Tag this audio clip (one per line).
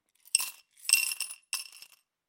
glass
currency
quarter
dime
change
money
coins
coin